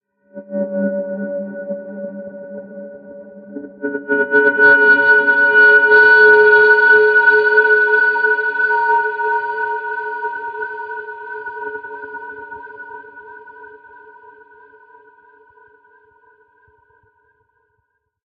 Samurai Jugular - 17
A samurai at your jugular! Weird sound effects I made that you can have, too.
experimental, high-pitched, sci-fi, sfx, sound, spacey, sweetener, time